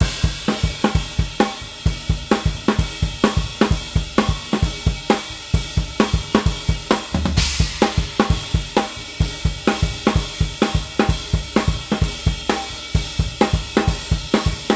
Acoustic drumloop recorded at 130bpm with the h4n handy recorder as overhead and a homemade kick mic.
drums, loop, h4n, acoustic